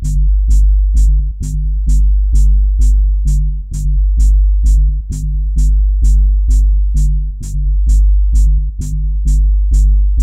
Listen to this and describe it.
Troy's 808 tape kick and hi hat
808 tape saturated kick and clean hi hat. 808 tape samples mixed by Troy on Virtual DJ 8.